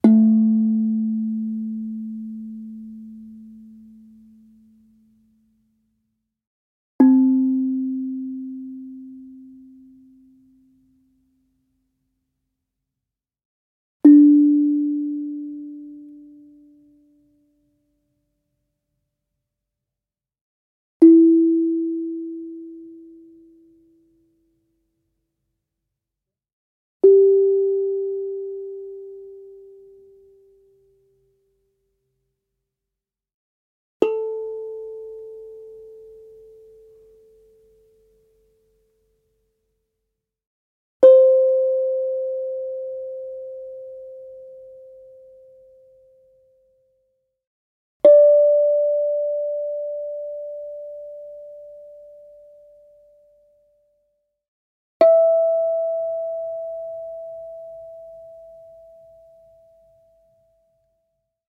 Steel tongue drum 9 samples